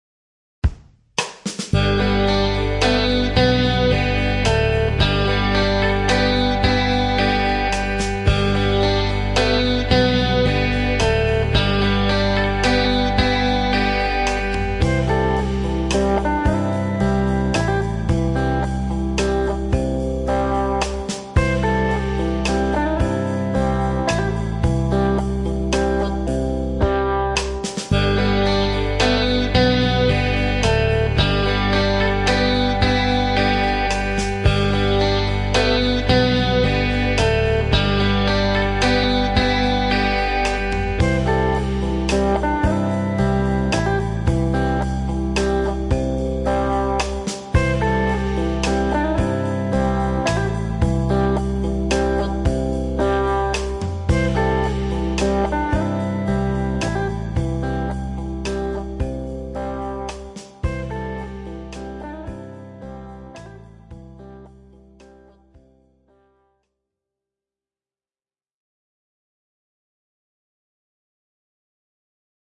rock music loop
repetitive rock music
rock, guitar, drum, loop, music